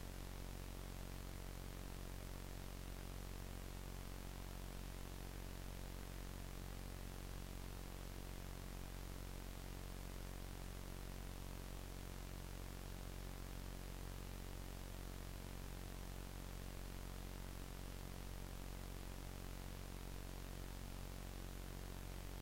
VHS VCR hum C
VHS Hum made in audacity when playing around with frequencies
tape, vcr, 80s, vhs